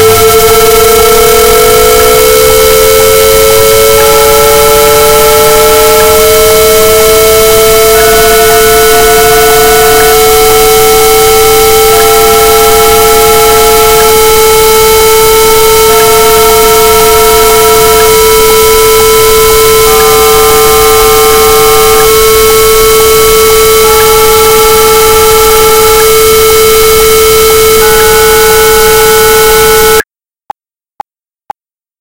the montage of noises
I tossed all the possible generated tones and noises from Audacity together.
weird, digital, electronic, noise, abstract, glitch